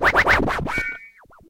The right mouse button trick was only slightly successfull so processing was in order to achieve the different scratch sounds. Is it a flare? Is it a chirp? Is it a transform?